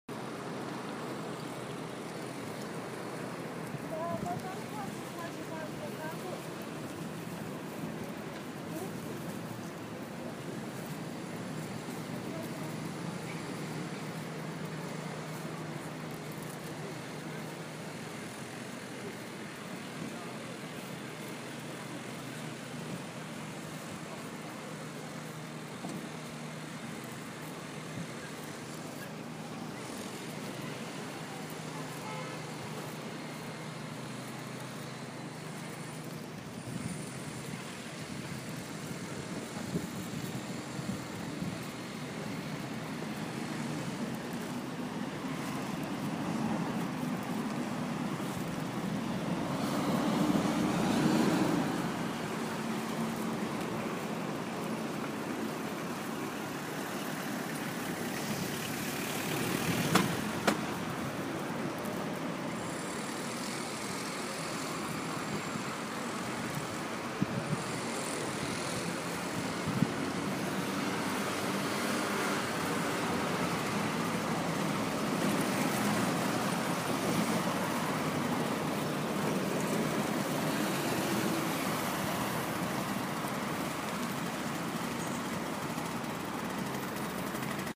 VIIIagosto/h24 lunedìore 10:00 by Sen
VIII Agosto /h 24 - UNA PIAZZA DA RIVISITARE
Progetto di rivisitazione di Piazza VIII Agosto a Bologna realizzata dal gruppo di studio dell'Accademia delle Belle Arti corso "progetto di interventi urbani e territoriali" del prof. Gino Gianuizzi con la collaborazione di Ilaria Mancino per l'analisi e elaborazione del paesaggio sonoro.
Questa registrazione è stata fatta lunedì di Maggio alle 10:00 da Sen